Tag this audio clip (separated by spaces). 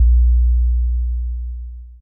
bass bass-drum drum effect kick kick-drum mic-noise